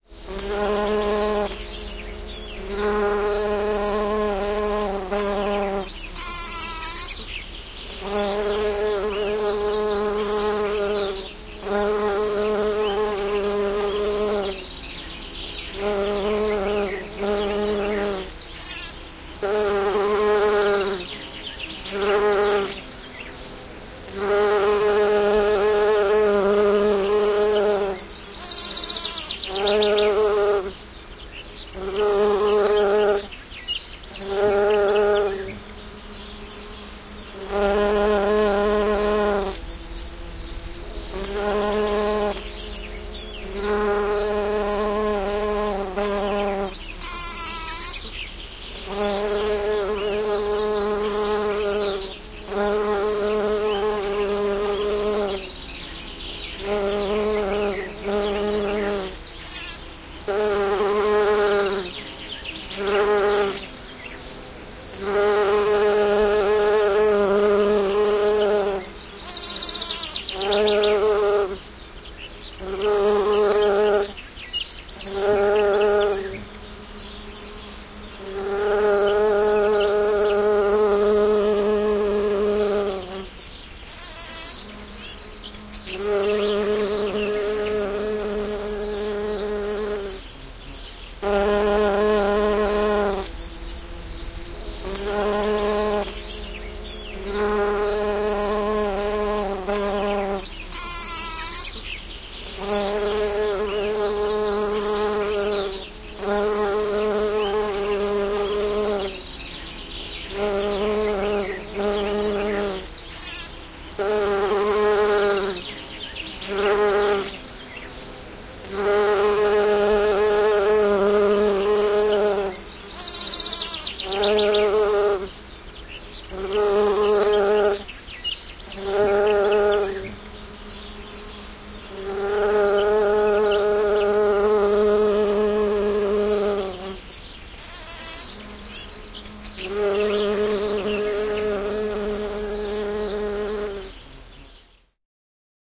Kimalainen pörrää kukassa, taustalla muut hyönteiset surisevat, pikkulintuja. Kesäinen niitty.
Paikka/Place: Suomi / Finland / Vihti, Hongisto
Aika/Date: 16.06.1993